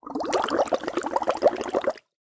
raw bubblingdrink6
Blowing bubbles into a plastic cup of water with a straw. Recorded using a Sony IC Recorder, processed in FL Studio's Edison to remove noise.